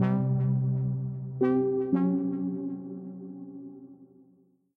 BOC to Work 5
170BPM, loop